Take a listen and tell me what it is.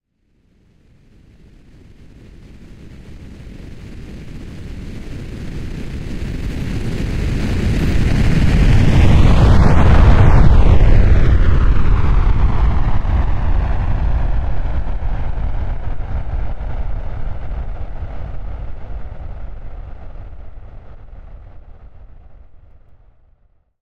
A rocket or Jet fly by made by me using pink noise and distortion in Adobe Audition. Enjoy :)
EDIT: Apparently there are high pitched points in here, but don't worry they go away after its downloaded
aircraft, launch, jet, plane, game-score, rocket, airplane, takeoff, fly-by, take-off, sound-design, film